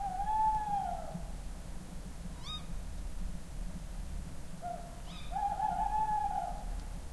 More of "our" owls. Late March in Perthshire /Scotland. I was too late
to get the gear ready for recording more. So only two burst of "song".
bird, scotland, field-recording, owl